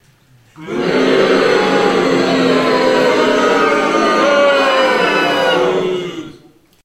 Audience Booing 2
Recorded with Sony HXR-MC50U Camcorder with an audience of about 40.
audience, booing, crowd, mob